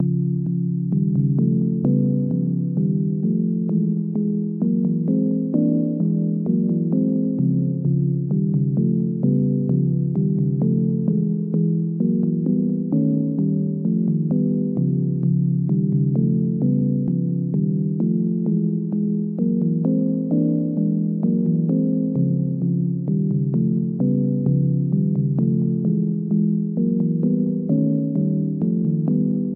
Lost in the Maze
This loop is meant to evoke a feeling of tension and suspense. The loop was created using FL Studio 20 FLEX synth.
Ambient, Atmosphere, Loop, Suspense, Tension